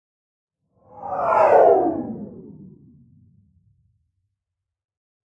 A spaceship flyby. A little doppler shift in there. A little reminiscent of a tie fighter. Shorter than version 2 and 3.